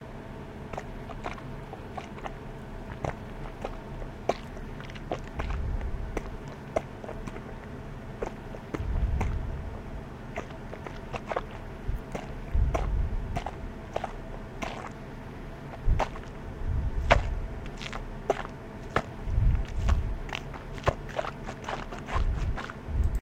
Something like walking in deep mud, could be used as a sound effect in a game or something
something